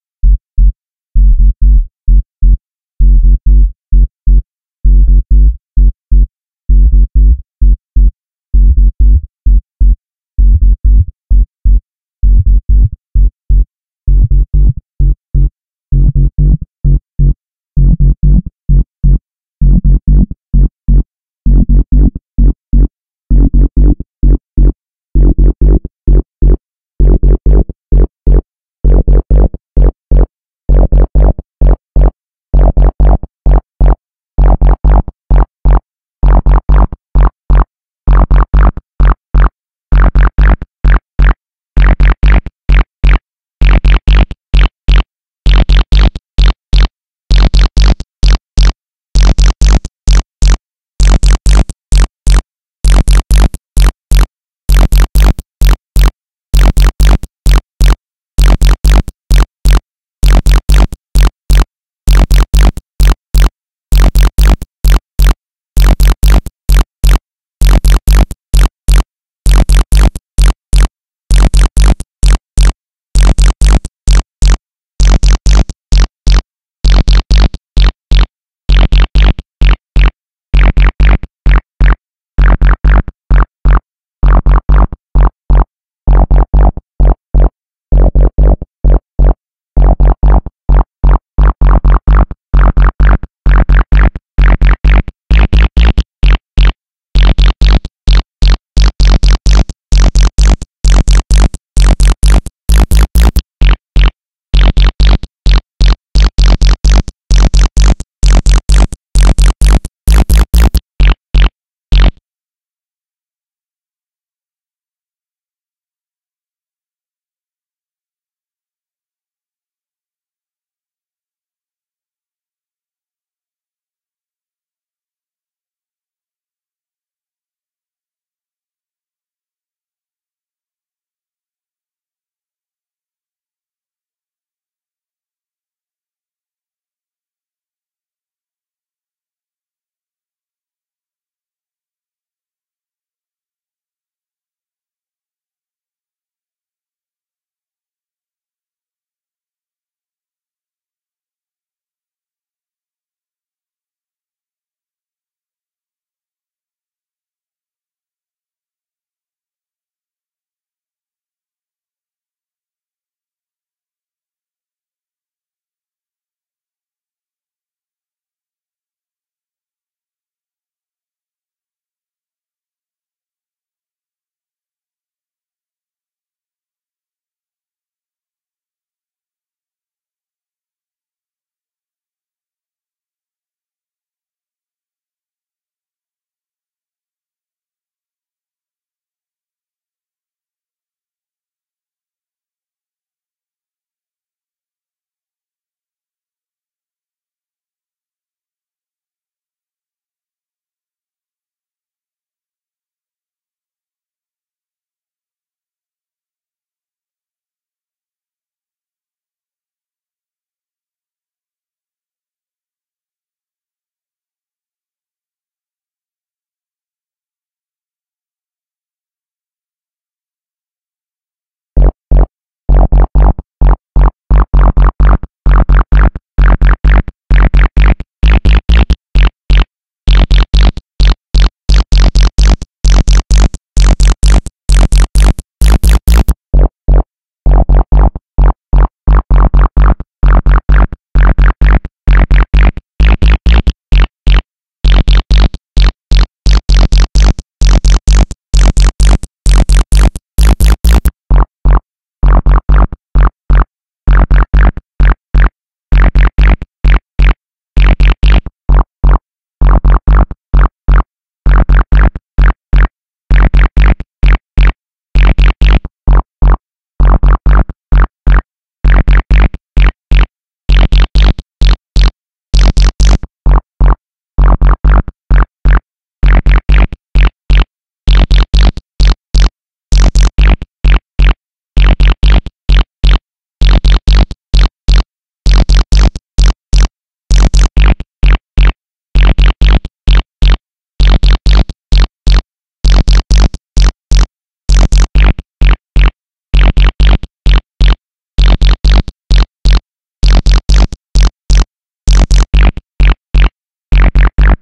Acid Modulation Sample 3
Acid sound for sampling, recorded with Ableton
Acid, Psychedelic, Experimental, Mental, TB-03